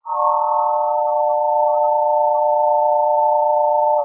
This sound was created with the technique invented and developed in my PhD, called Histogram Mapping Synthesis (HMS). HMS is based on Cellular Automata (CA) which are mathematical/computational models that create moving images. In the context of HMS, these images are analysed by histogram measurements, giving as a result a sequence of histograms. In a nutshell, these histogram sequences are converted into spectrograms which in turn are rendered into sounds. Additional DSP methods were developed to control the CA and the synthesis so as to be able to design and produce sounds in a predictable and controllable manner.
Additive-Synthesis, algorithmic, Cellular-Automata, Dynamical-Systems, electronic, Histogram-Mapping-Synthesis, metallic, synth